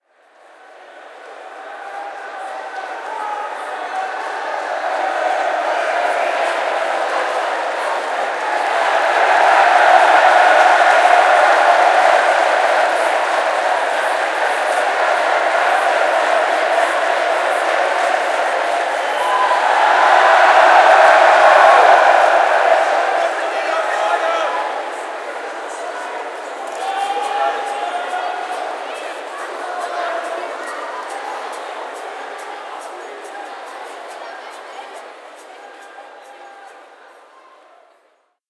Crowd Cheering - Soft Cheering and Chatter
A sound of a cheering crowd, recorded with a Zoom H5.